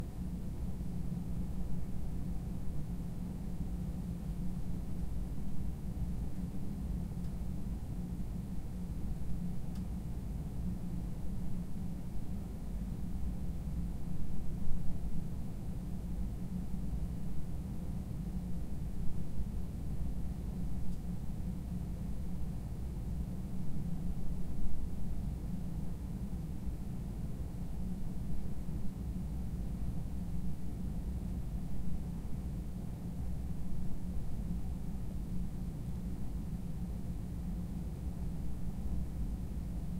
ROOM TONE QUIET CLASSROOM 01
A quiet classroom roomtone recorded with a Tascam DR-40